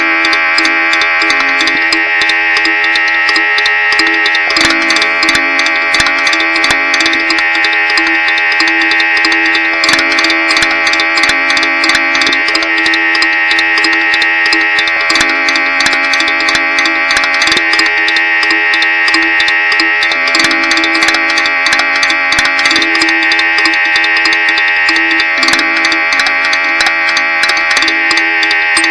small, short sounds that can be used for composing...anything